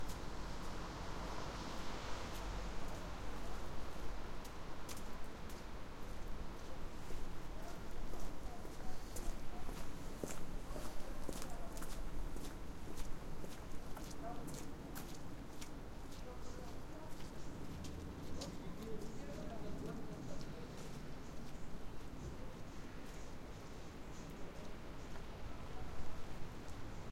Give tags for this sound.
Berlin
raining
sidewalk

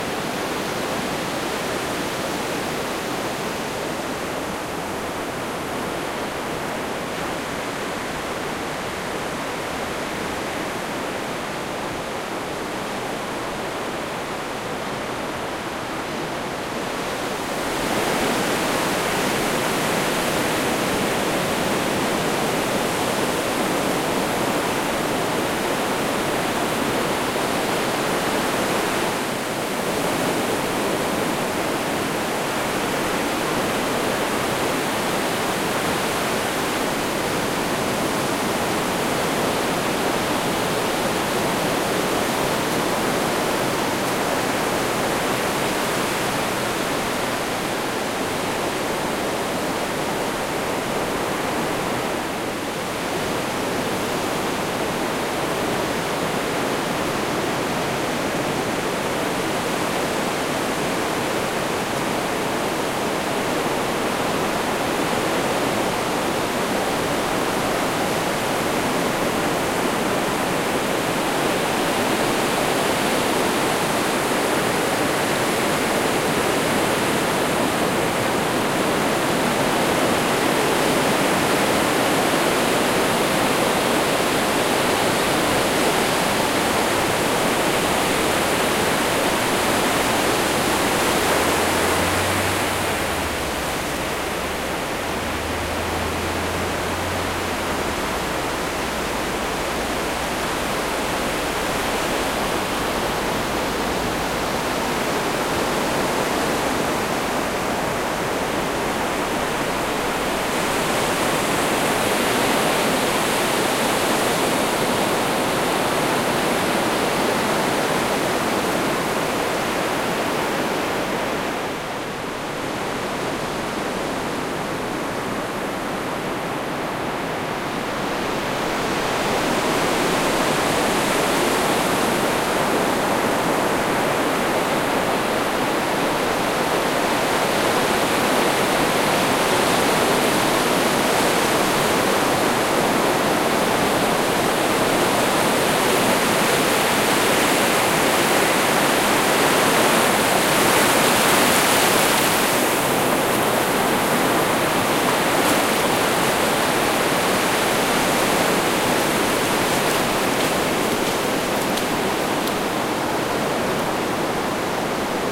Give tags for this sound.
ocean
sea
mar

